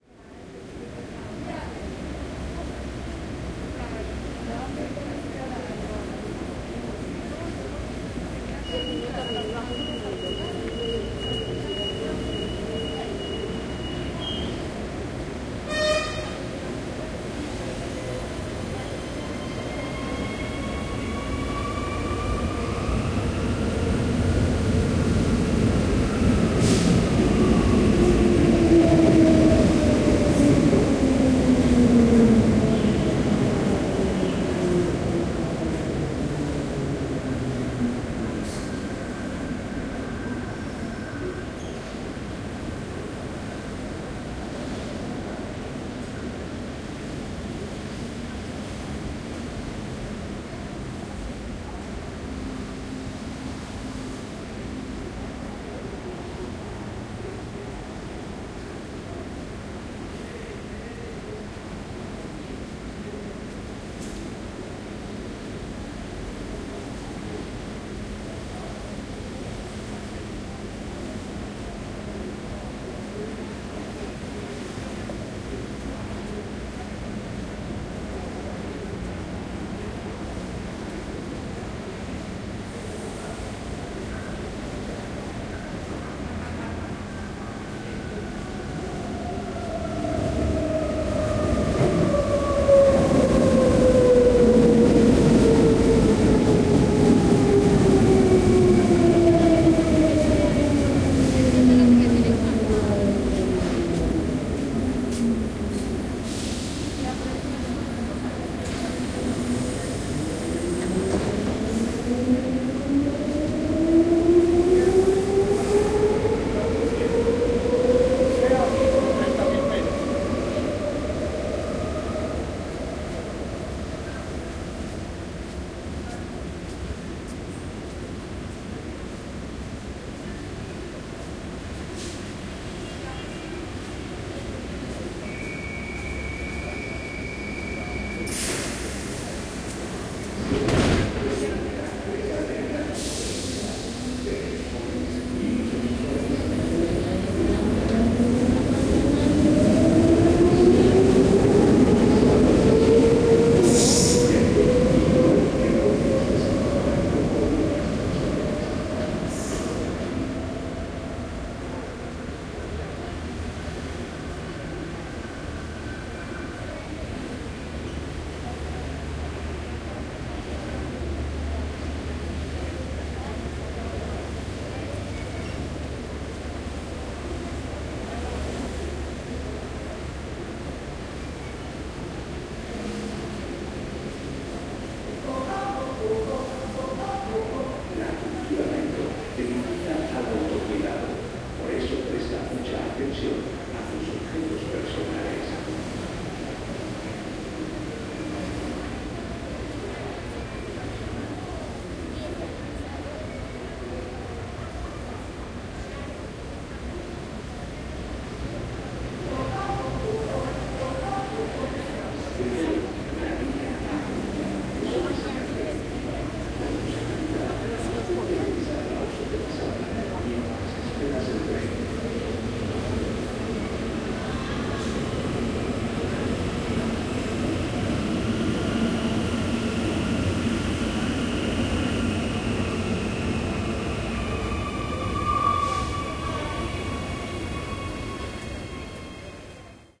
Medellin Metro Busy Frequent Walla Stereo
Ambience and walla from a Medellin's metro station with frequent trains passing by Stereo. Recorded with Zoom H3-VR.
Crowd, Walla, Waiting-Subway, Busy-Subway, Ambience, Metro